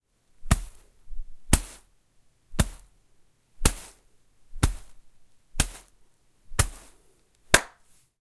This Foley sample was recorded with a Zoom H4n, edited in Ableton Live 9 and Mastered in Studio One.
clap, compact, design, hands, mic, microphone, percussive, struck, transient